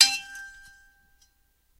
Teapot lid - pliers - hard strike - SM58 - 4 inches
I struck the lid of a Lagostina M96A tea pot using 8-inch Channellock steel pliers. I sampled the strike twice, using medium and heavy speed.
Both samples were Normalized.
Teapot-lid, pliers, Shure-SM58